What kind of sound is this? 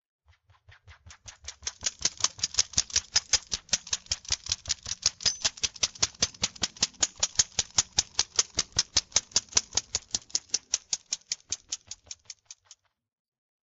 Dog Scratching Itself With Tags Jingling Foley
I needed a sound FX of a dog scratching itself for a 3D animation, so I did this foley recording.
First I tapped a bunch of keys to simulate the tags jingling. Then I scratched my pants to the timing of first track.
Enjoy!